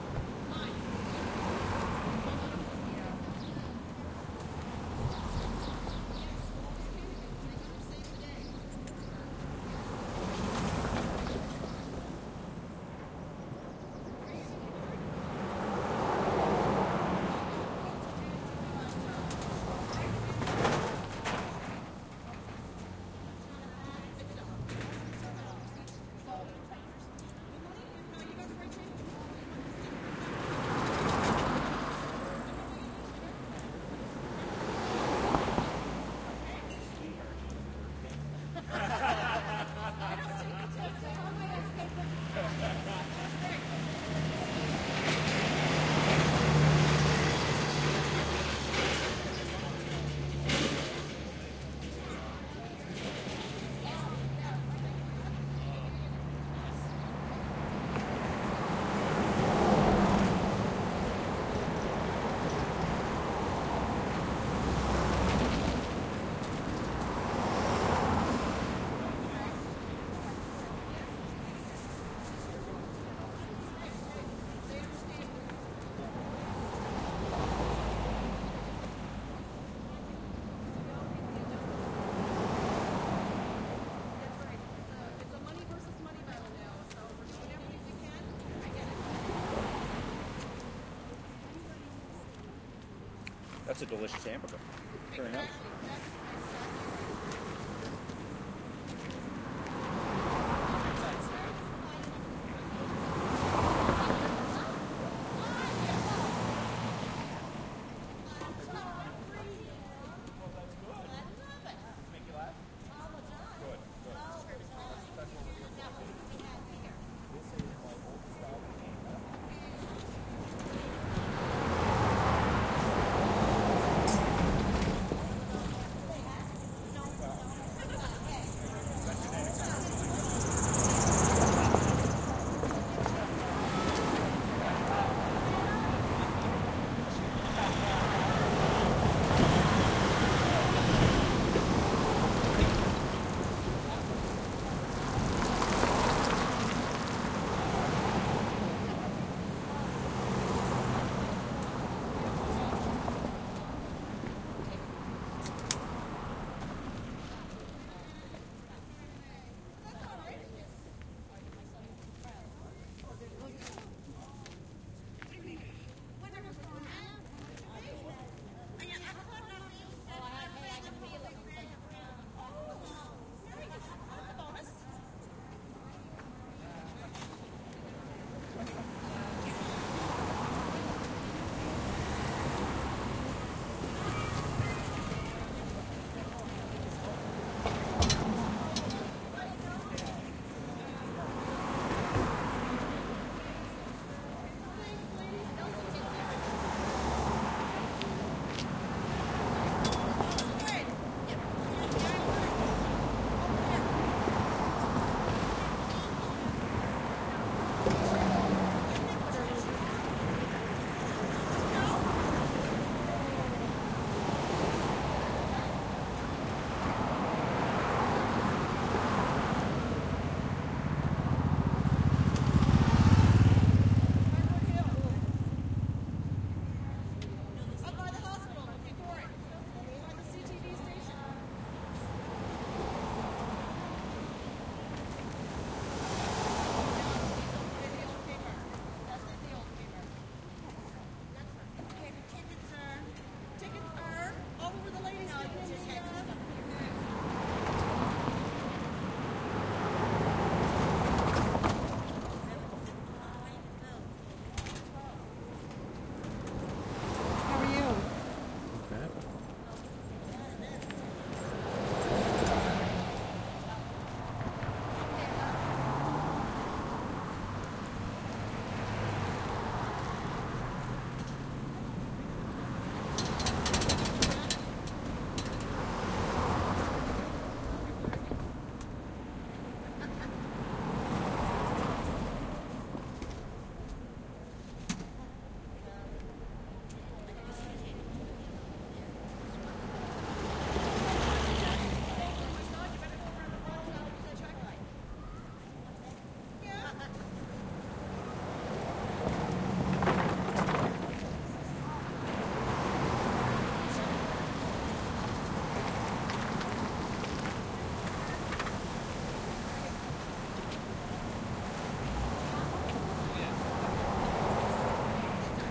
Yard Sale Ambience
Recorded at a yard sale. Location was right on the sidewalk so much of the sound is passing traffic, though there is plenty of conversation and occasional laughing all around.
Big truck with rough engine passes around 0:45.
Car engine passes with nice low end sound at 1:18.
Somebody likes his hamburger at 1:35.
Car with studs still on tires passes at 2:25.
Somebody opens a can of pop at 2:35.
Motorcycle passes at 3:42.
Somebody asks me how I am at 4:18.
people, ambient, street-noise, ambience, field-recording, ambiance, city, street, noise, traffic, soundscape, atmosphere